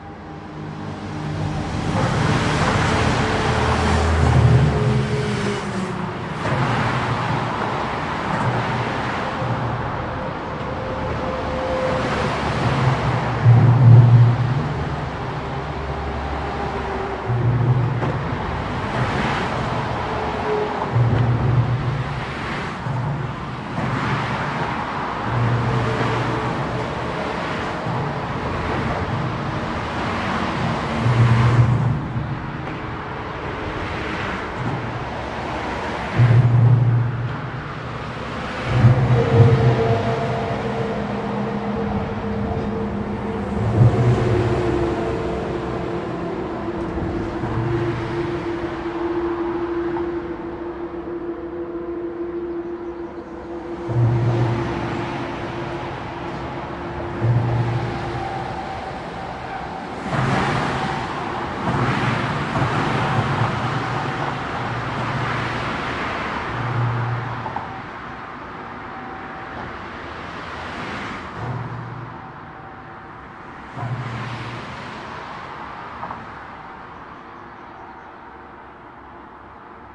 4ch field recording of an underpass below a German motorway, the A38 by Leipzig.
The recorder is located in the center of the underpass, angled up into a gap between the two lanes, affording a clear stereo picture of cars and trucks passing directly by the recorder, with the motorway noise reverberating in the underpass in the background.
Recorded with a Zoom H2 with a Rycote windscreen, mounted on a boom pole.
These are the FRONT channels, mics set to 90° dispersion.
atmo, atmosphere, Autobahn, backdrop, background, car, cars, clank, clonk, driving, field-recording, freeway, highway, hollow, loud, motorway, noisy, road, surround, traffic, trucks, tunnel